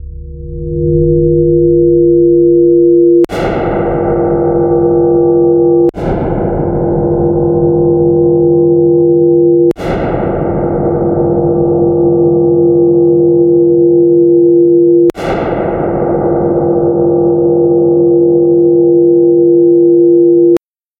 Feedback Reverb
RV7000 Advanced Reverb's feedback.
noise, feedback, digital, reverb